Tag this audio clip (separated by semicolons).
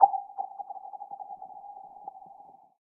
ice; field-recording; cold; winter; lake; chill; skid; crack